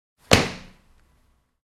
one foot stomping on a wooden floor
Recorded With ZOOM H1 Handy Recorder